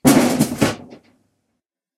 Hit on metal - 1
Wood being hit/falling.
{"fr":"Coup sur du métal - 1","desc":"Un coup sur du métal ou une chute d'un objet en métal.","tags":"métal frapper porte coup tomber"}
door, falling, hit, knock, metal